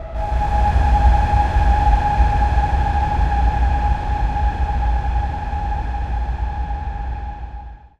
SteamPipe 4 Ambient Landscape E3
This sample is part of the "SteamPipe Multisample 4 Ambient Landscape"
sample pack. It is a multisample to import into your favourite samples.
An ambient pad sound, suitable for ambient soundsculptures. In the
sample pack there are 16 samples evenly spread across 5 octaves (C1
till C6). The note in the sample name (C, E or G#) does not indicate
the pitch of the sound but the key on my keyboard. The sound was
created with the SteamPipe V3 ensemble from the user library of Reaktor. After that normalising and fades were applied within Cubase SX & Wavelab.
multisample, reaktor, atmosphere, ambient, pad